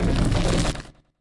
I think, this sound is good for spawning metallic or sharp objects in games.
Maybe, falling of metallic barrel or some strange space rocks will be apropriate.
Opening of spaceship doors seems good too.